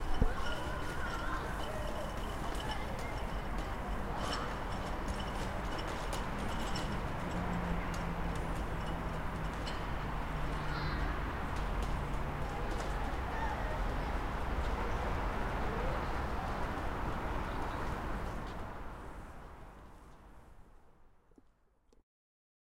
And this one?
Sound of streets
cars, Poland, district, dziecko, city, butelki, bottle, child, warsaw, butelka, dzielnica, wozek, car, Drunkard, track, autobus, evening